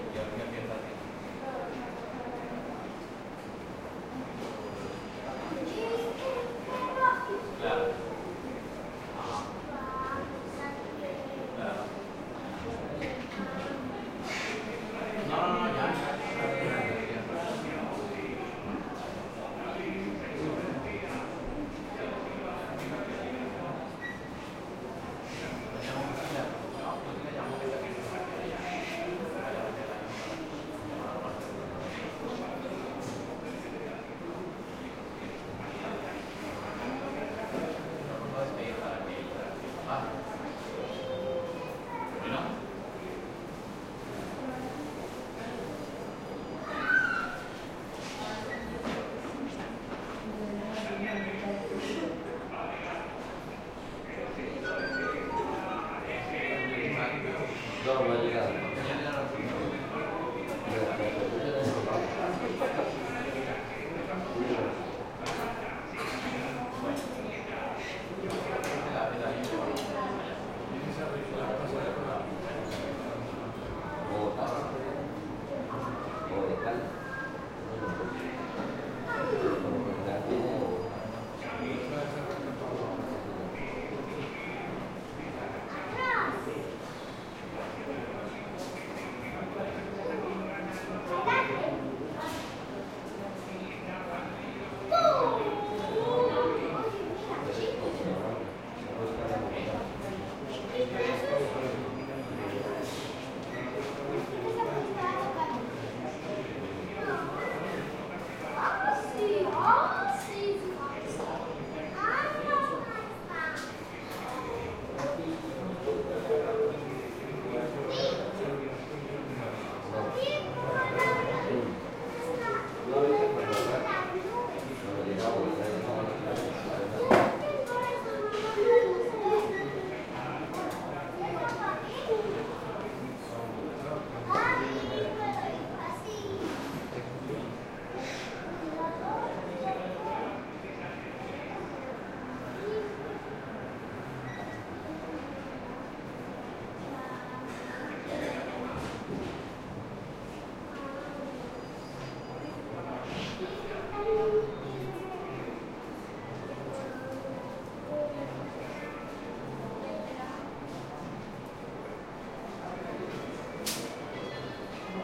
airport small waiting room light crowd kid Pasto Narino, Colombia1
airport small waiting room light crowd kid Pasto Narino, Colombia
small, light, waiting, room, airport, crowd